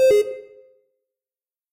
Just some more synthesised bleeps and beeps by me.